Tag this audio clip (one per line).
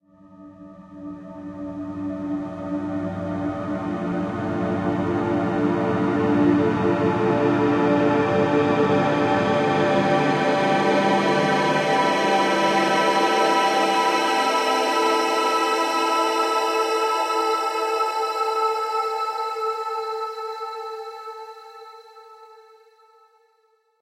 emotion
floating
distant
headphone-enhanced
convolution
synthetic-atmospheres
ethereal
atmospheric
metallic
blurred